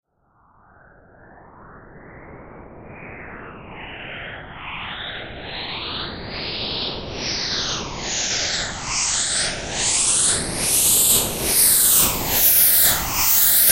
Noise Rising 02
A noise rising.
Ambiance, Ambience, Ambient, AmbientPsychedelic, FX, Noise, Processed, Rising, Sci-fi, Trance